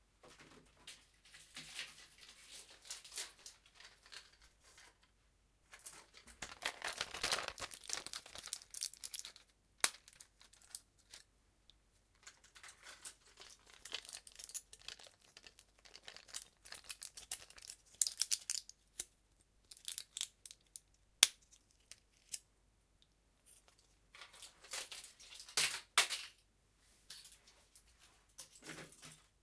Taking pills from plastic package. I take the package, bring one pill and get it back twice. At the end, the package drops in to floor. Recorded with Zoom H1 internal mic.